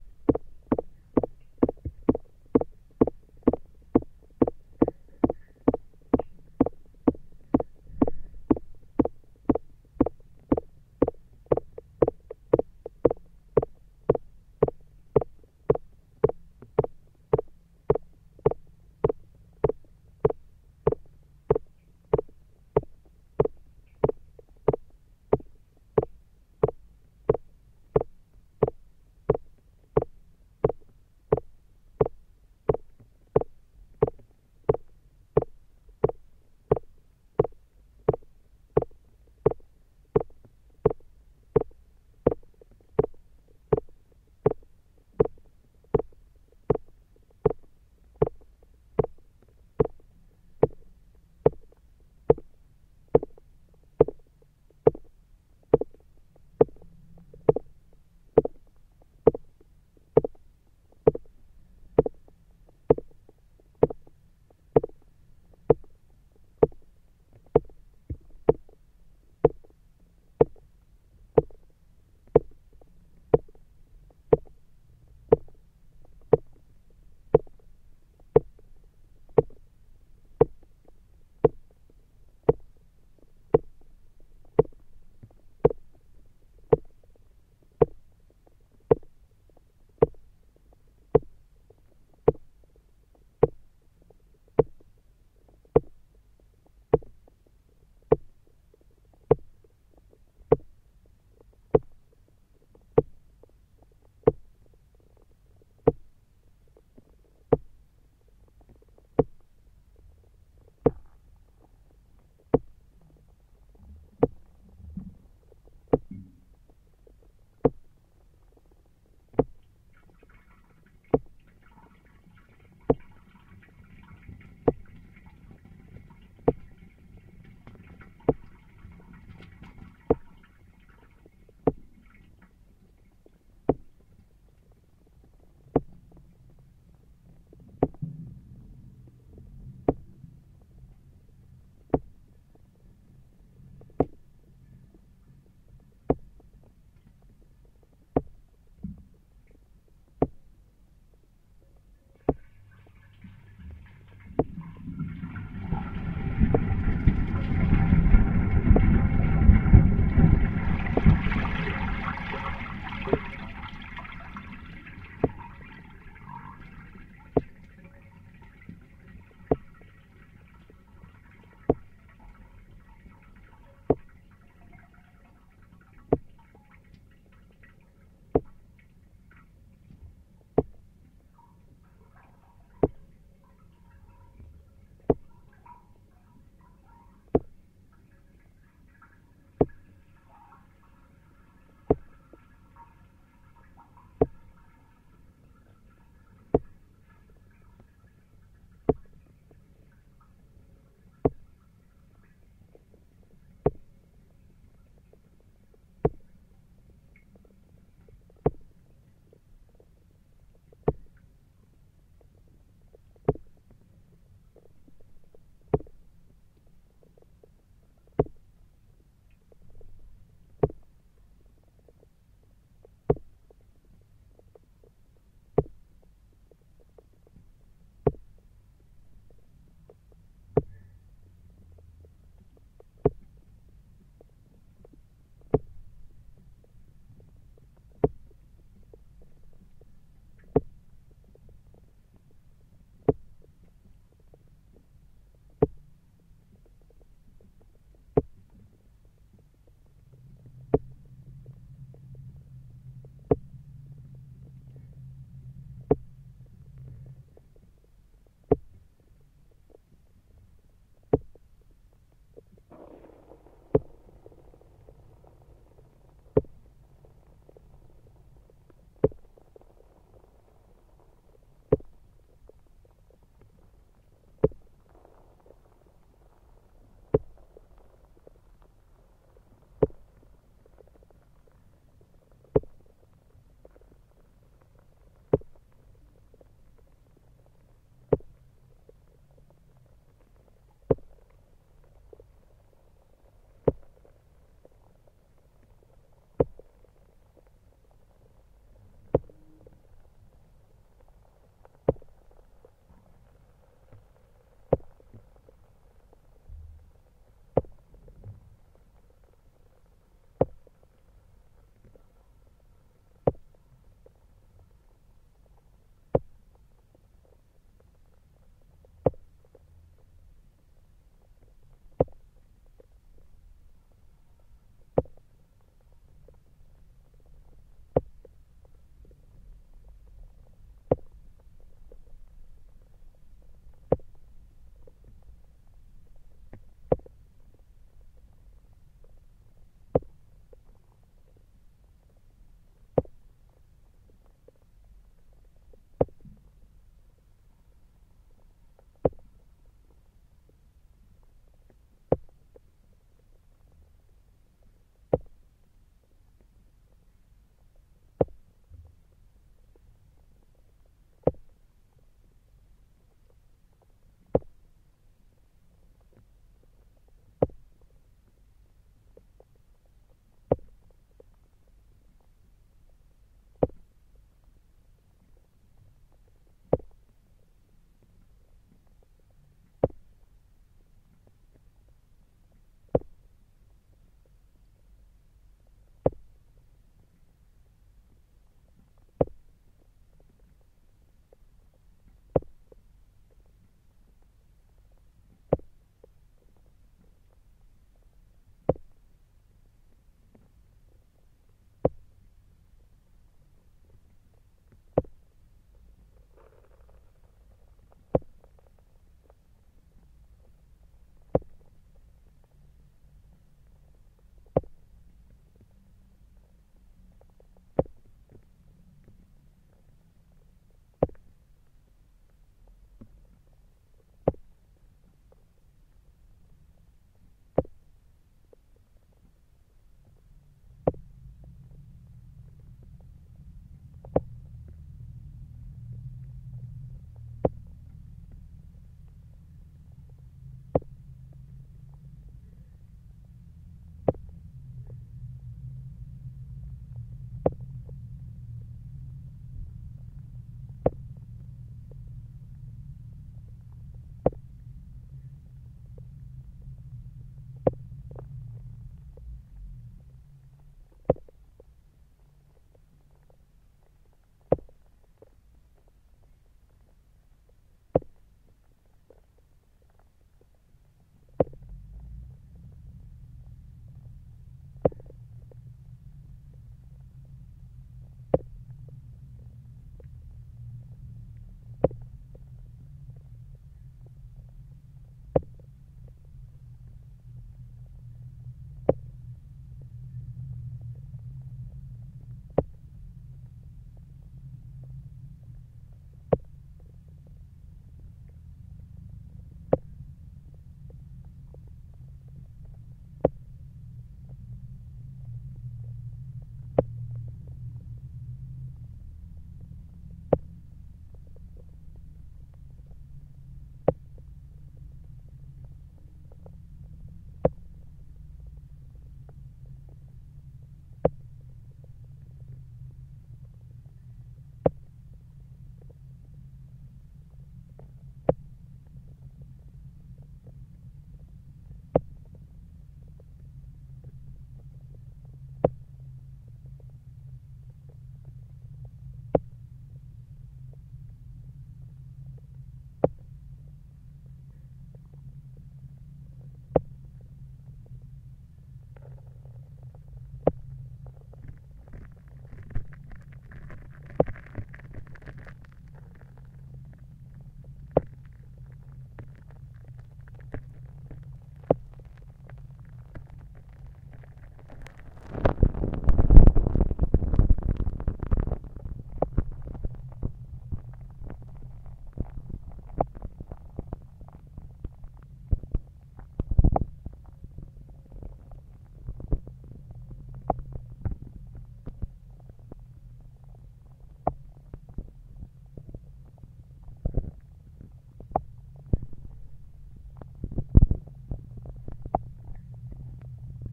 Alcantarillado ciego
Date: 08-10-2010
Time: 05:05 A.M.
At my feet in the ground there is a trapdoor to the sewer system. The total length of the microphone and the cable is 10 meters and 28 centimeters.
The entry to the sewer allows me to go down 3 meters [ more or less ], and there I find a hole where I slide the micro. After this operation I still have 3 meters of cable outside the little hole and I am a bit affraid because I don't know if there is water or something that can break the microphone, so I stop sliding it down.
I can't know what I listen now but I like it a lot.
The microphone used for this recording is a Rode NTG2 and in this occasion I lost the "cover" of it when I returned and recovered the cable and the micro up.
The location of this recording is : Terrassa, in Spain.
By the way, I love the interval of vibrations that start at 07':10"
city, clicks, dark, field-recording, obscure, sewer, underground, vibrations